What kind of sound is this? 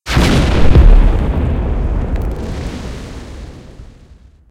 Grende With Falling Earth
This Sound Here Is Basically Made Up Of A Grenade Throw And Being Blown Up And Earth Noises As It Whould Be In Real Life
crackle, earth, falling, grenade